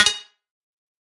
MA SFX Clicky 7
Sound from pack: "Mobile Arcade"
100% FREE!
200 HQ SFX, and loops.
Best used for match3, platformer, runners.
abstract, digital, effect, electric, electronic, freaky, free-music, future, fx, game-sfx, glitch, lo-fi, loop, machine, noise, sci-fi, sfx, sound-design, soundeffect